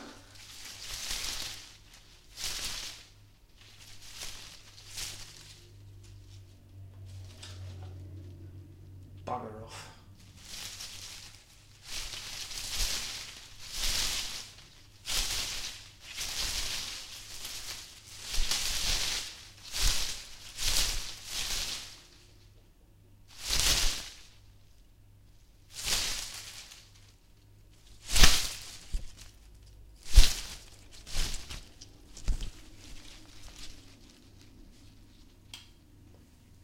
HOT SIGNAL.Leaf sounds I recorded with an AKG c3000. With background noise, but not really noticeable when played at lower levels.When soft (try that), the sounds are pretty subtle.
shrubbery, noise, bush, bushes